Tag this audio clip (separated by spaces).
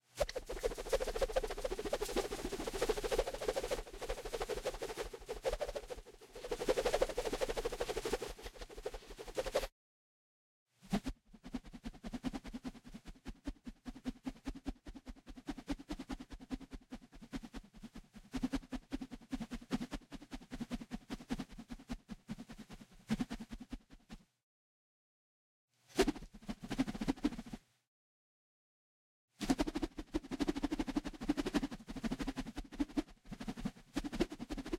Fighting Swish-Swash